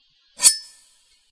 Quick-slide
One quick metallic slide made with a meat cleaver on a plastic cutting board.
Super fun to make.
bread, cleaver, clutter, knife, meat, quick, scratch, sharpen, slide, steel, utensil